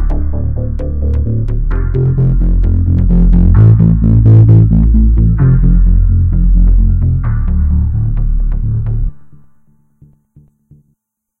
When your character face to the danger, use this sound.